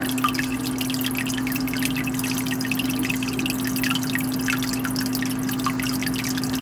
fish-tank-fltr-edit
Recording of fish tank water (filtered) spilling into the tank. Recorded with Tascam DR-05.
aquarium, filtering, fish, water